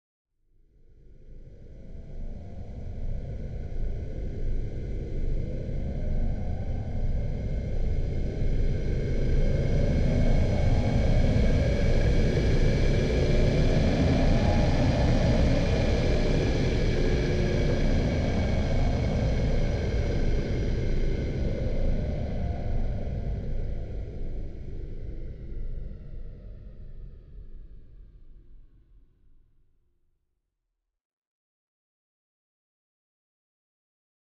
Medium-heavy spaceship fly-by
Sound of a medium-heavy spaceship flying by. Made with Serum, HOFA MS-Pan and Tritik Krush.
I uploaded the source material before panning and distortion as well, so you can build your own fly-by. If you want the same distortion settings, just use the init patch in Krush and turn up the Drive to about 60% and Crush to 30%, adjust to taste.
spaceship, exhaust, fly-by, drone, hover, futuristic, engine, sounddesign, medium-heavy, sound-design, alien, future, space, sci-fi, fly